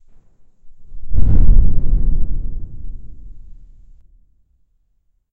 loud bassy rush of sound being sucked out of a room
whoosh, spooky, loud, creepy, woom, sound, weird, bass, dramatic, spacial, mystical, magic, scary